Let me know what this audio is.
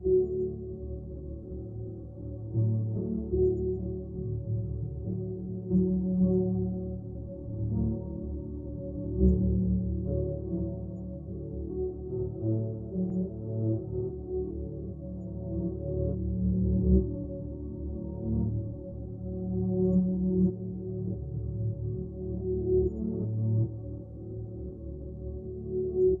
A sound created for the Continuum-5 dare. This was originally a four bar snare section. Using the convolution processor in Audition, I made a new impulse to dreamify the snares.